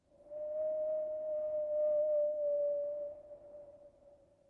Wind whistling indoors - flat.